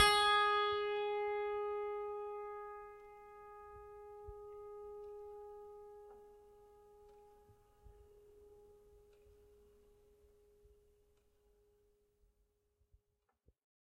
a multisample pack of piano strings played with a finger
fingered, multi, piano, strings